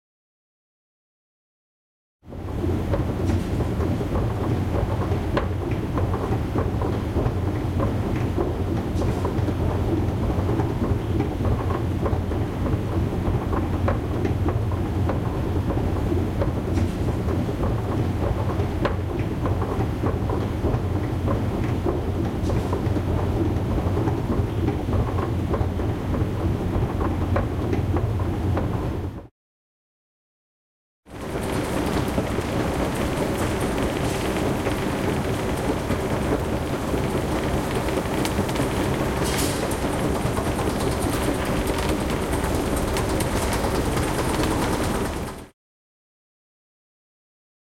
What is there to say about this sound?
Recording made using the ZOOM H2n handy recorder. Sound/noise of escalator stairs, good for any scene filmd in subway.
city, escalator, metro, panska, stairs, subway, tube